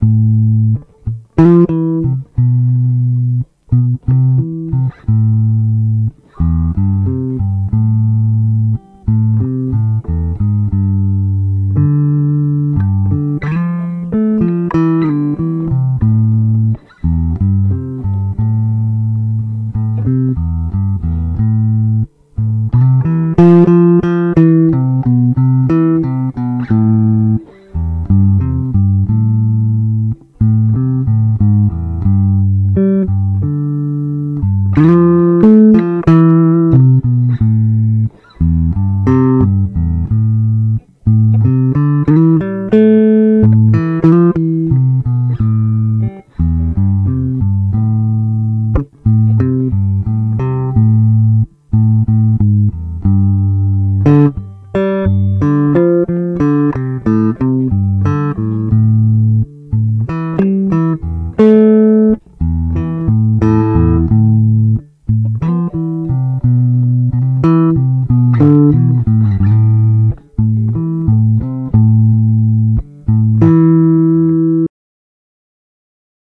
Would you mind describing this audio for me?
Aminor bluestheme 90bpm
Guitar again and again !
loops, loop, looping, 90bpm, guitar